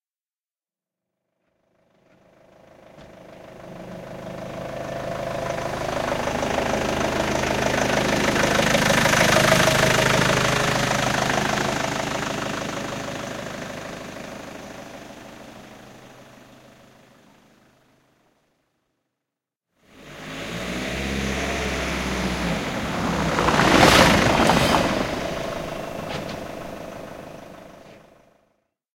This is a BMW 320d (diesel 2000cc) 1680kg automatic gear vehicle scramble through a line between two microphone, then do it again but faster acceleration
vehicle, gear, diesel, automatic, accelerate, acceleration, bmw, car